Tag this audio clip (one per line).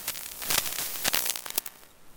sparking
noise
electricity
Sparks
buzz
faulty
cable
fault
static